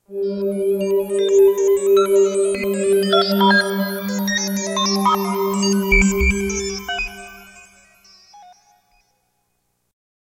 A cool sound made on an inexpensive Fender Telecaster, a GK-2 pickup and a Roland GR-33 Guitar Synth.